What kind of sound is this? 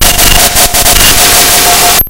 These are TR 505 one shots on a Bent 505, some are 1 bar Patterns and so forth! good for a Battery Kit.
505, a, beatz, bent, circuit, distorted, drums, glitch, hammertone, higher, hits, oneshot, than